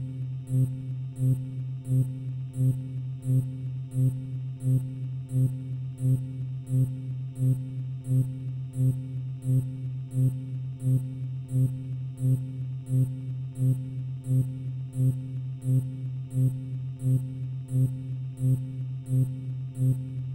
Alarm sound 10
A futuristic alarm sound
Alarms, Bells, Electronic, Futuristic, Noise, Sci-Fi, Space, Whistles